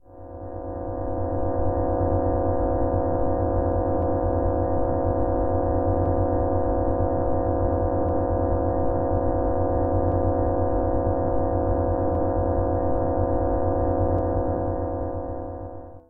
Mystic Ambient (No vinyl)
I filtered the crap outta my computer's humm, looped it oh-so-skillfully ;P and this is what I got, I couldn't resist putting vinyl crackle on it. It was destiny!
computer, drone, humm, pulse